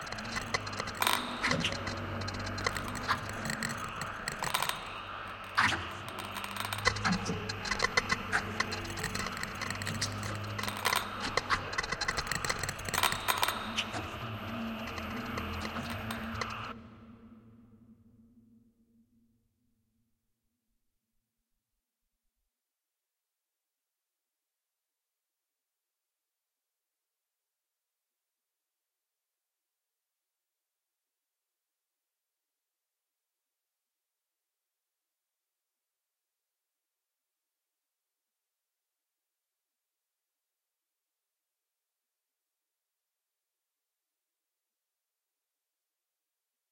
Forcefield destroyed loop
Heavily relying on granular synthesis and convolution
ambience, broken, destoryed, forcefield, loop, magic, spell